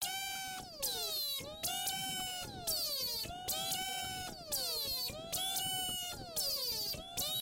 weird alarm
An alien-sounding sort of alarm sound. Randomly generated with synth1.
alarm, alien, annoying, electronic, screech, shrill, siren, sound-design, wail